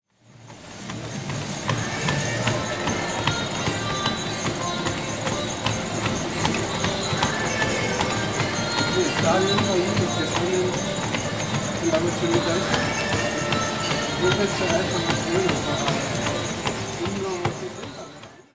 paisaje-sonoro-uem-Cinta CorrerGYM
Paisaje sonoro del Campus de la Universidad Europea de Madrid.
European University of Madrid campus soundscape.
Cinta CorrerGYM
Europea, UEM, Universidad, paisaje, soundscape, de, sonoro, Madrid